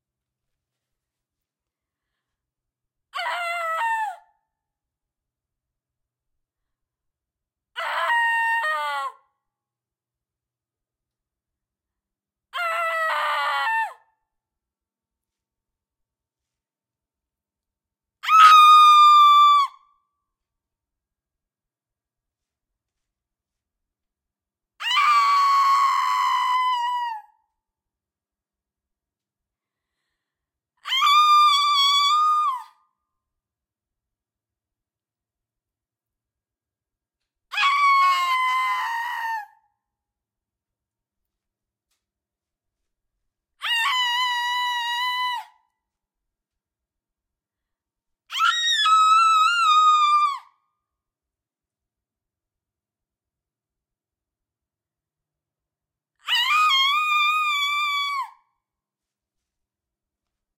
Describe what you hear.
Multiple female screams with slightly differing timbres. Great for layering.
Recorded with the Rode NT3 condenser microphone into Cubase.
hell, anger, shout, scream, yelling, scary, haunted, nightmare, evil, terror, sinister